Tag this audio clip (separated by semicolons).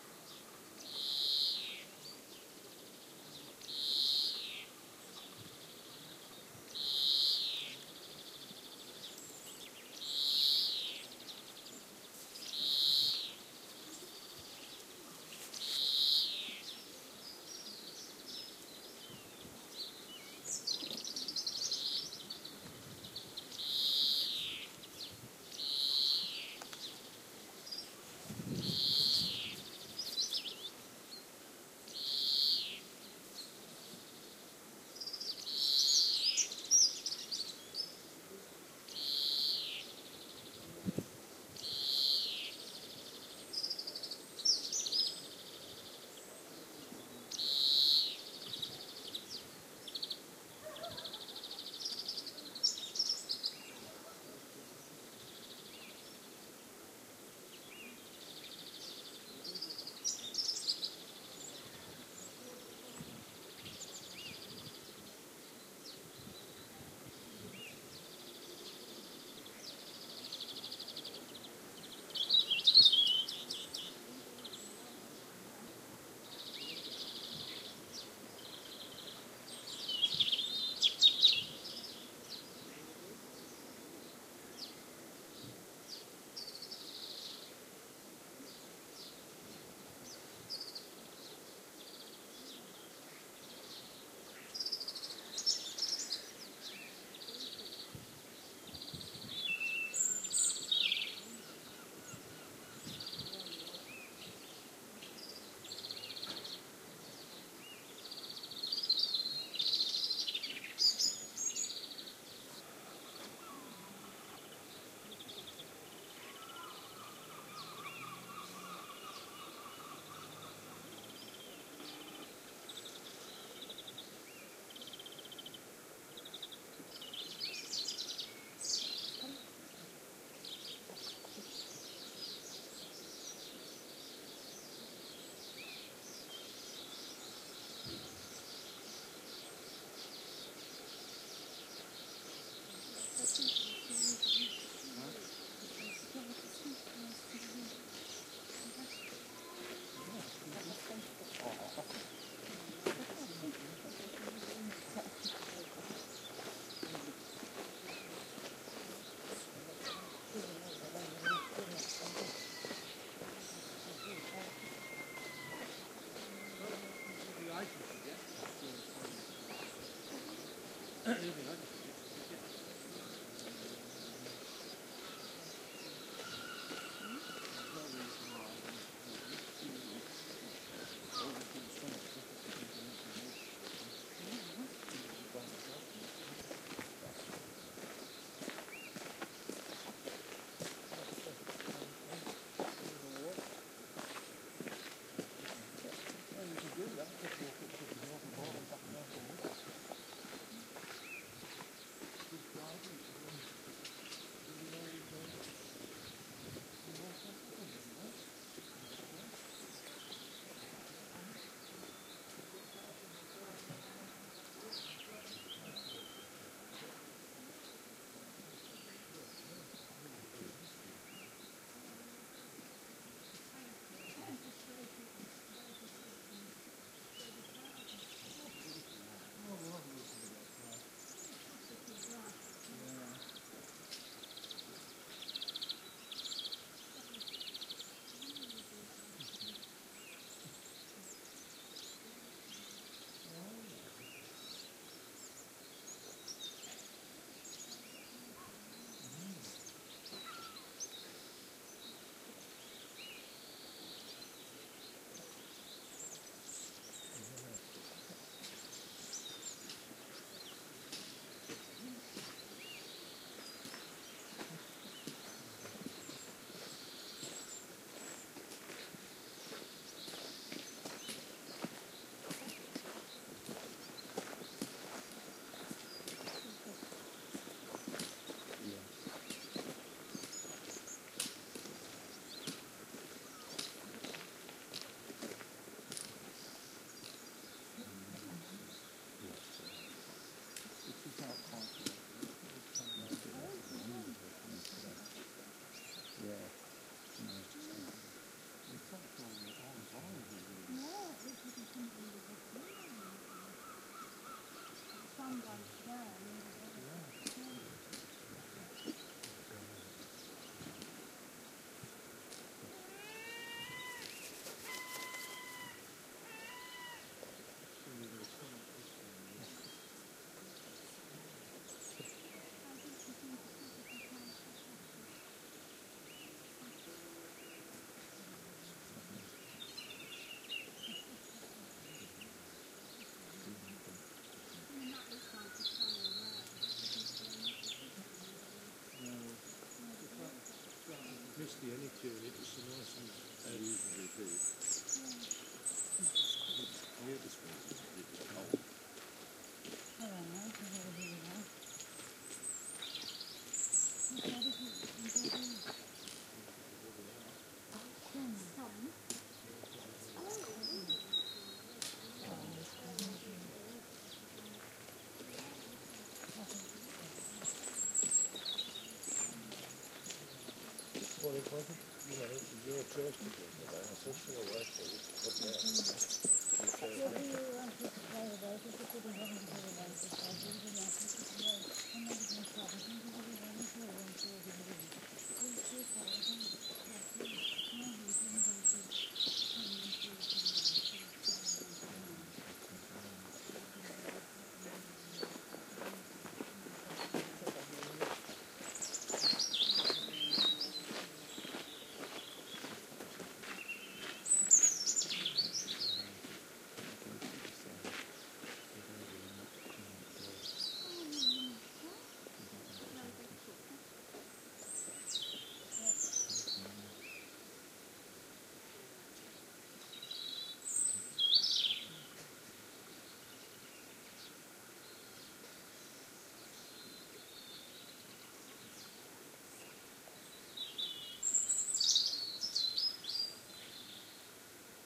background-sound; general-noise; walkers; atmosphere; field-recording; soundscape; people-walking; coastal; atmos; nature; chat; birdsong; ambient; ambiance; ambience; birds